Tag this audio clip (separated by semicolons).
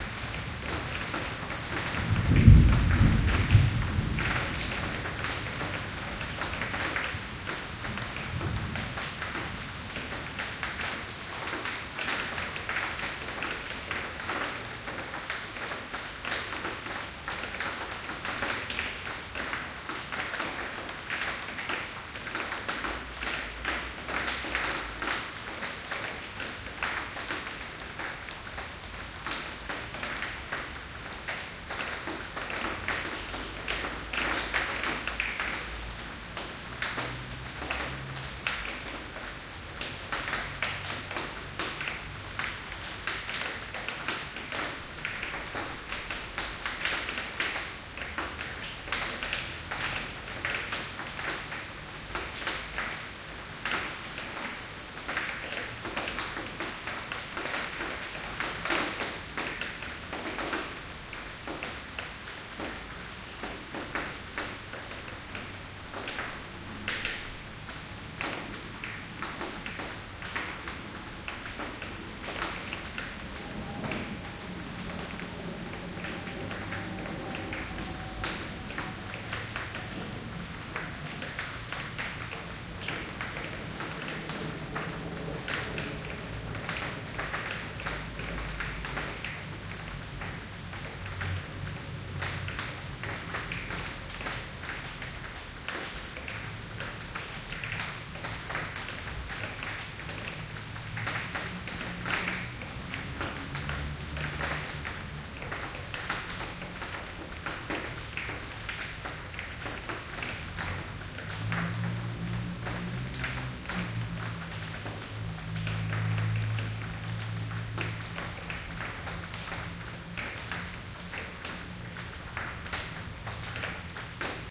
house; rain; thunder